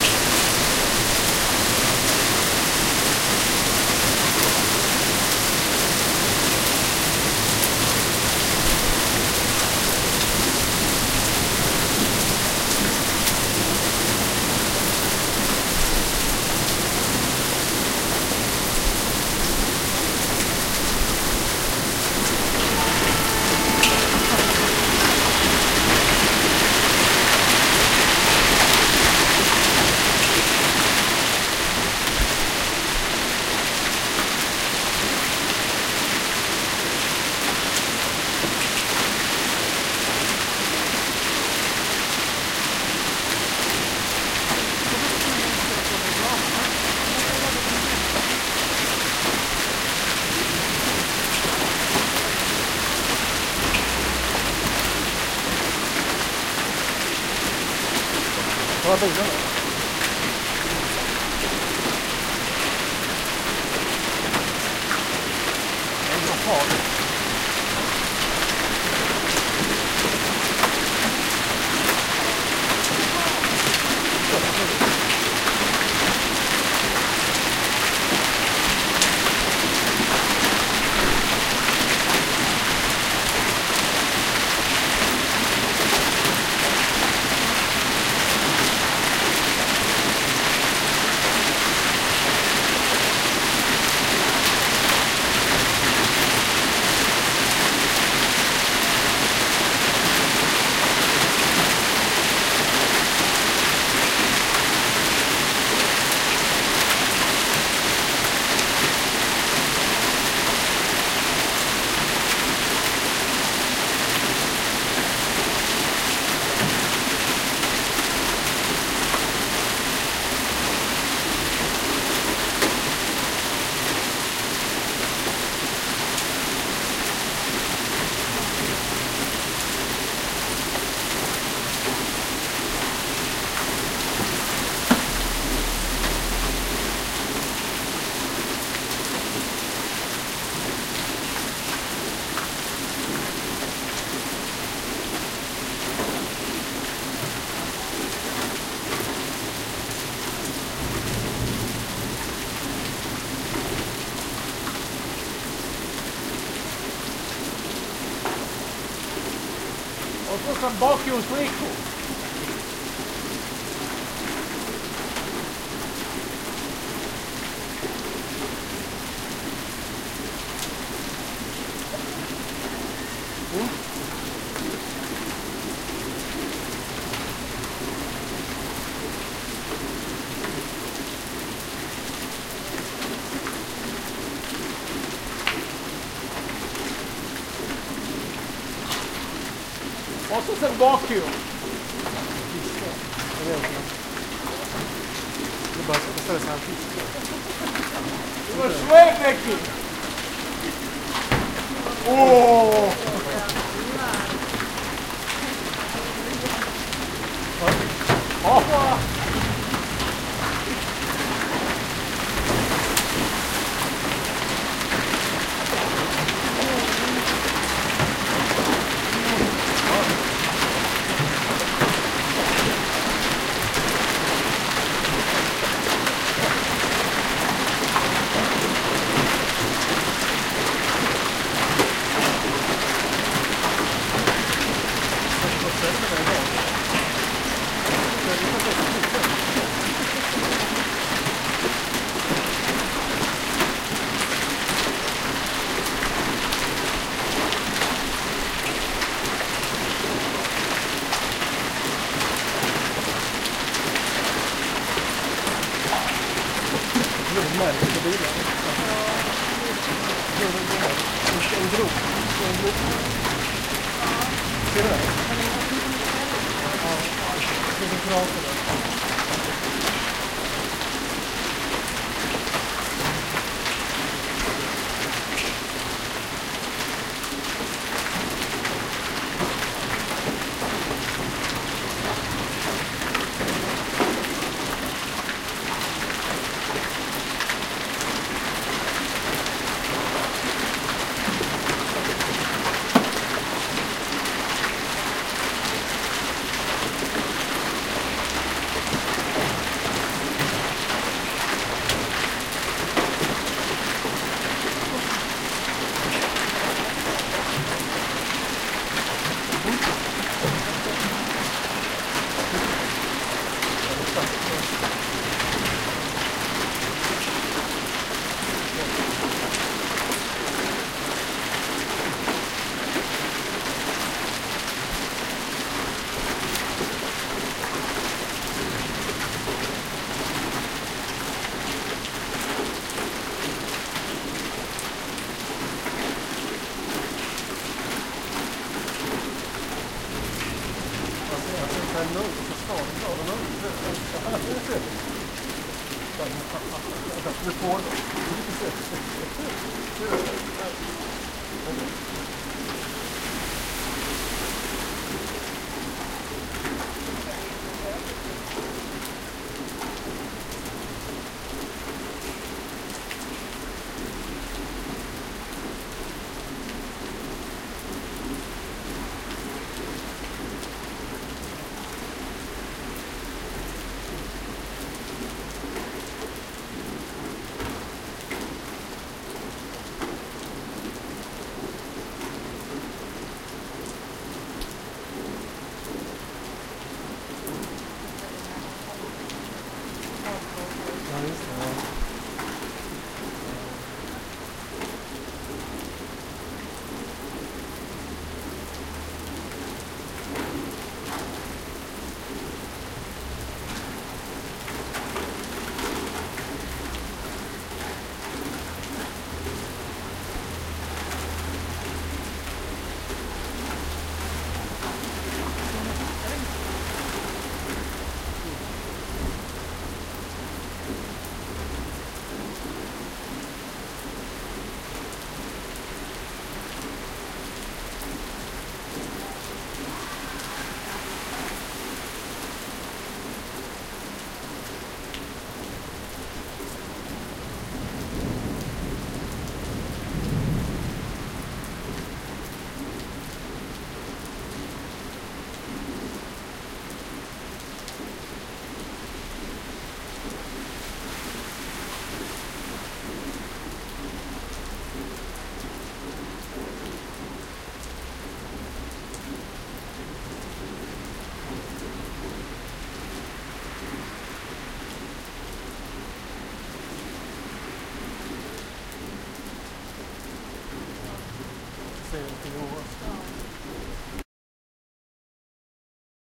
Hail storm. Recorded in Belgrade 20/6 - 2016.
Hail big as tennis balls was falling down from the sky, and made damage on cars parked on the street.
Recorded with a Zoom H1 and edited with Audacity and Reaper.